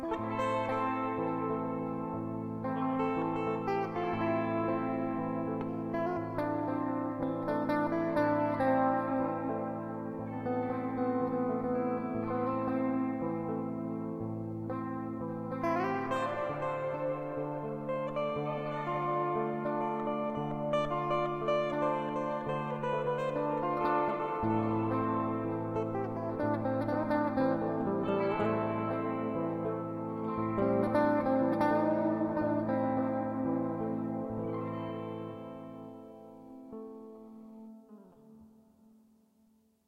Road to purple sky - Guitar Loop
Created with Studio One, my electric and western guitar.
Loop for game, film and other. I hope it'll be useful)
open, Atmosphere, metallic, searching, Suspense, loop, background, cinematic, film, pizzicato, discreet, repetitive, strings, clipped, movie, loopable, minor, plucked, guitar, slow, Spooky, muted, melancholic, Ambient, repetition, subtle, dreamy